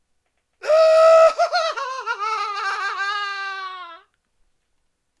evil laugh-16
After making them ash up with Analogchill's Scream file i got bored and made this small pack of evil laughs.
male, laugh, solo, evil